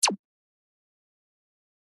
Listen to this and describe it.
A laser sound intended for a 2D game.